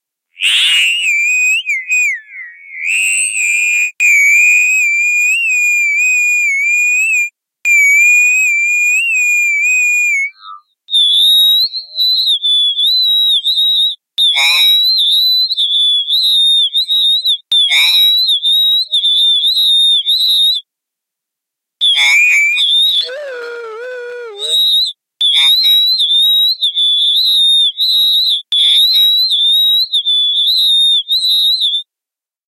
Third Bird Synth 02
Alien birds warbling.
fauna, birdsong, tweeting, tweet, chirping, synth, synthetic, birds, model, throat, resonant, unnatural, bird, chirp, howl